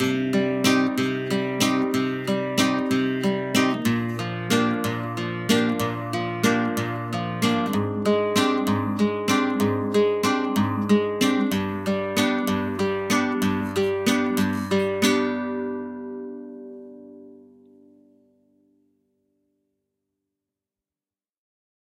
Nylon string guitar loop. This is part B of a 2 part loop.